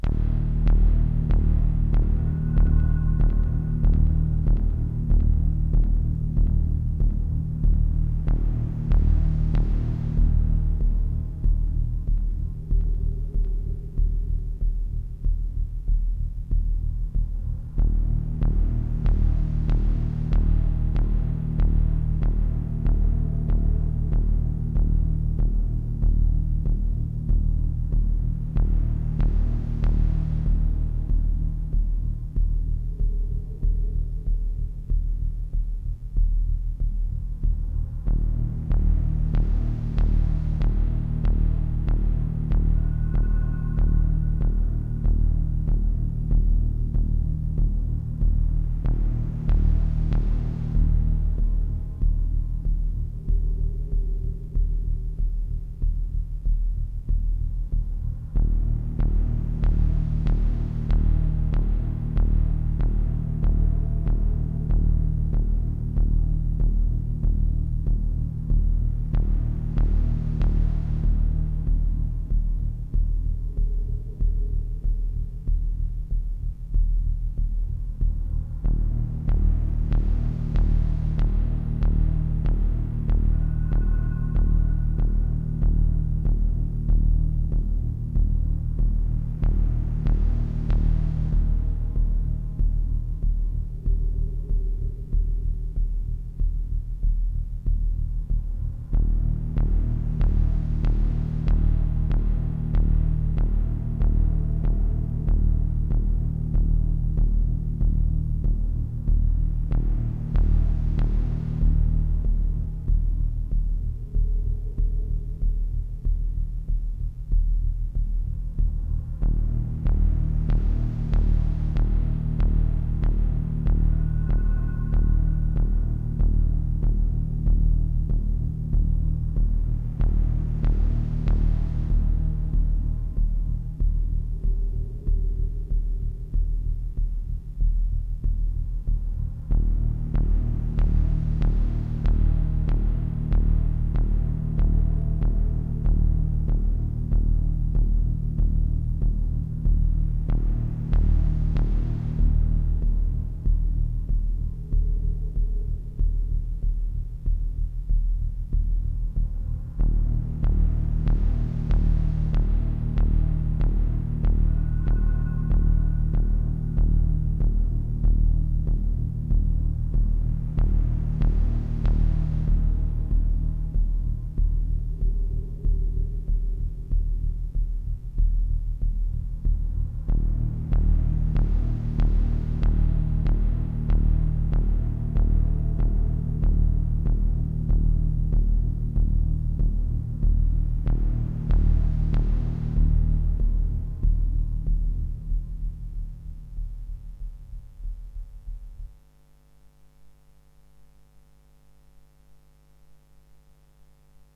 wavestate background fear adventure thriller drama phantom nightmare haunted fearful thrill suspense terror spooky terrifying sinister dramatic scary macabre ghost anxious hell creepy spectre bogey weird atmos delusion grisly Gothic phantasm frightful shady nexpectedly imminent depressive fearing wierd background-sound threatening cellar impending general imaginair symphonic frightened film frightening tonal texture whitenoise